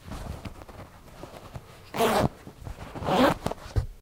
Flys on jeans being unzipped, then zipped back up.